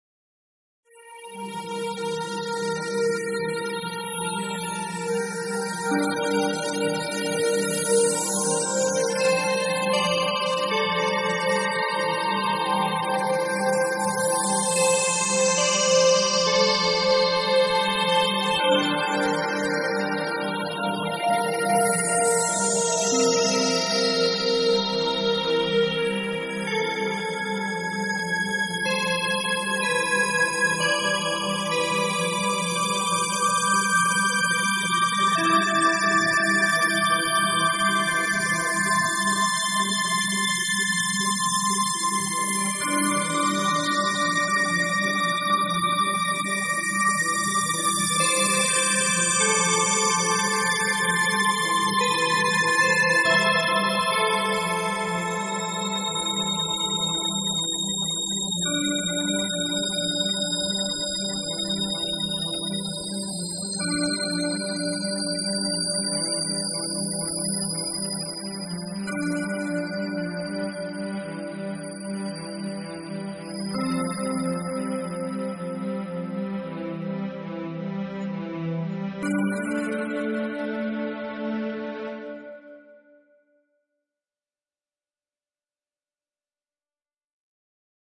sonidos espaciales y campanas con sintetizador.
Aurora bell synth sound.
space, pads, synth, bell, bells, campanas, sintetizador, aurora